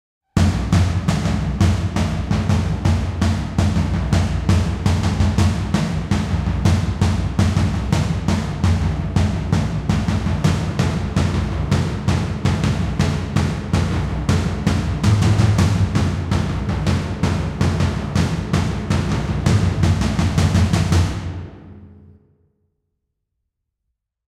Action drums 7.8 (Low)
Have some drums (pretty much a low tom played with generic 7.8 sticking)